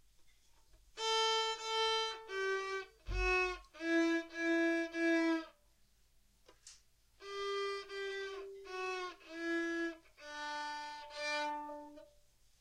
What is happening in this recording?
violin practise 01
Recording of my son practising his violin for the first time, at home. It's a piece of danish music, writtien by Halfdan Rasmussen and Daniel Helldén and its called "Blæsten har så travlt". Roughly translated to "the wind is in a hurry".
Recorded with a TSM PR1 portable digital recorder, with external stereo microphones. Edited in Audacity 1.3.5-beta on ubuntu 8.04.2 linux.
music, practise, violin